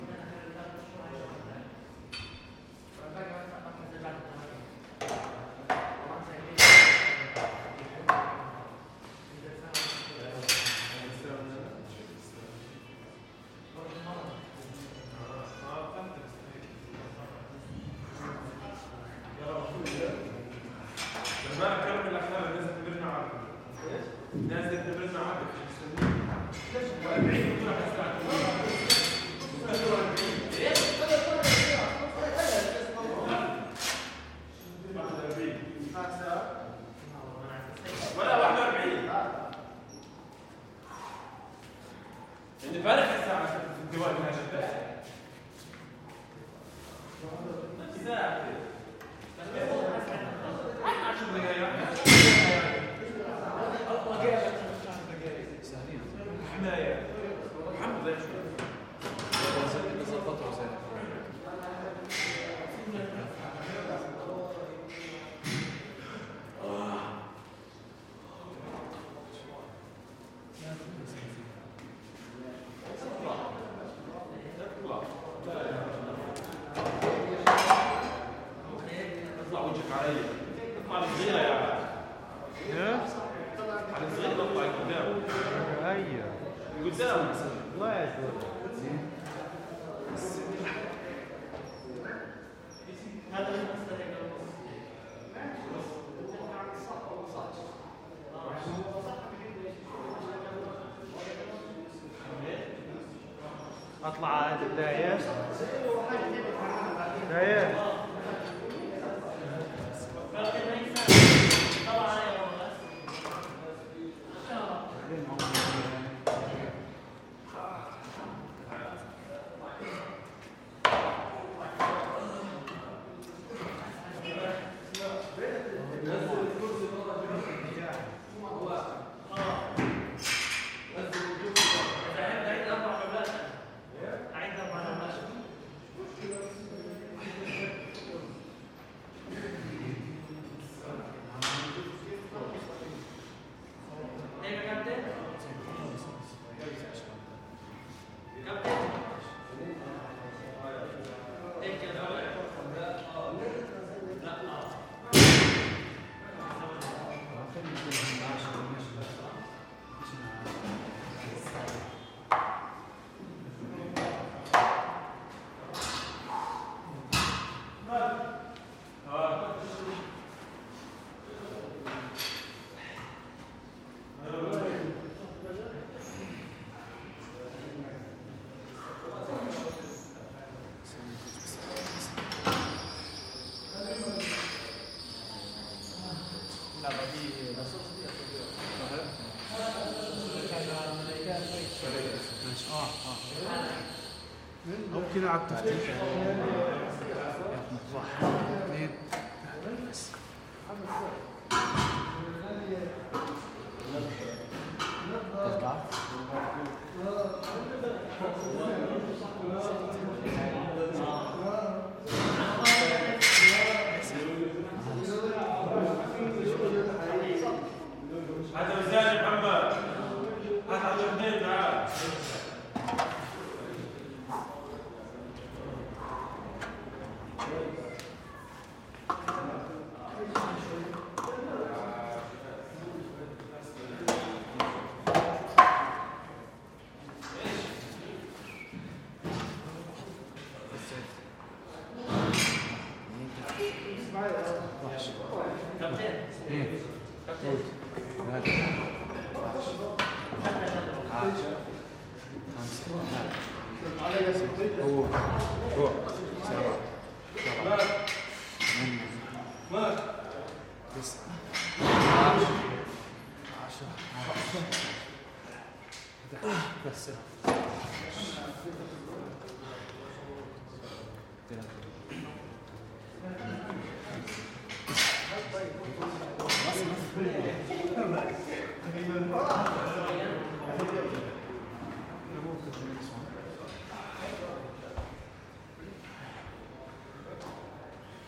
Tornado Gym guys working out arabic voices and weights clang roomy4 small perspective Gaza 2016
arabic, guys, gym, out, weights, working